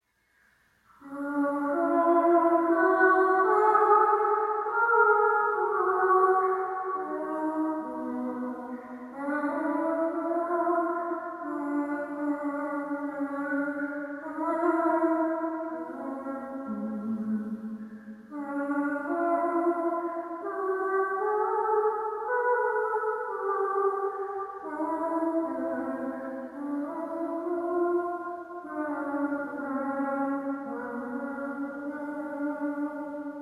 and make sure when credit it in your project bio